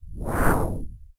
SFX WOOSH 002
Some kind of (transitional) whooshing sound effect. Could be used e.g. in documentaries for illustrating transitions between different shots, or in games/interactive presentations for the same purpose.
sfx, soundeffect, switching